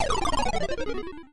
Game Over Arcade
Game over sound effect for games.
game, arcade, development, over